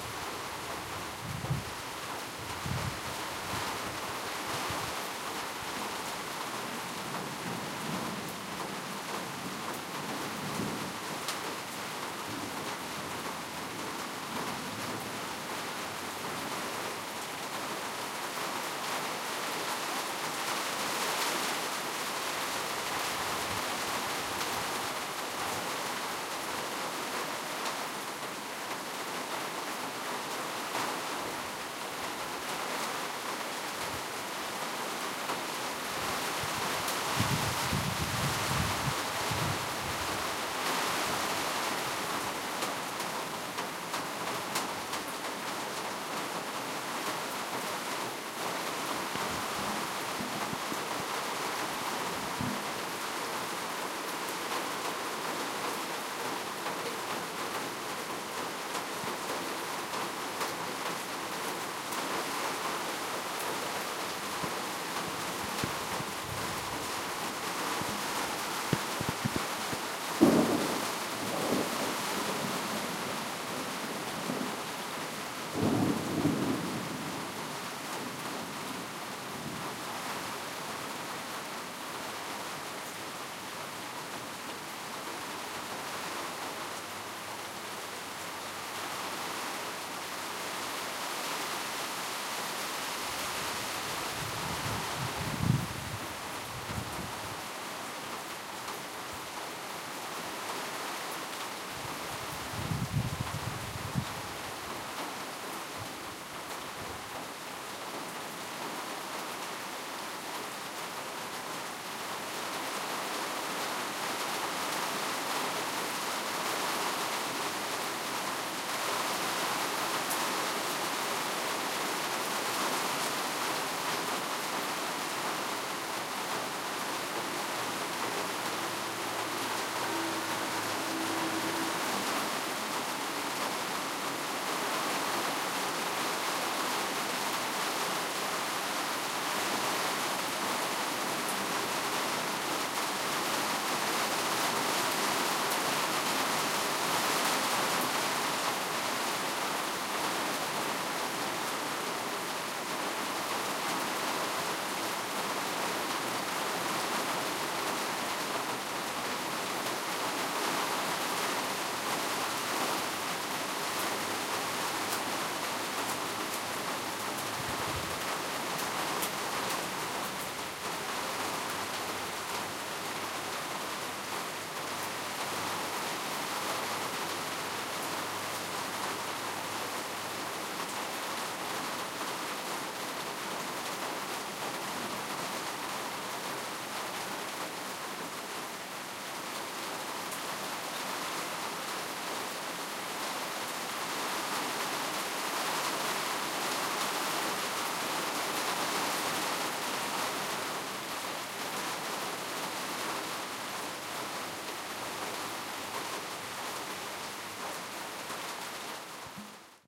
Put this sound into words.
Heavy rain hitting the roof, wind, occasional thundering. A moped driving by.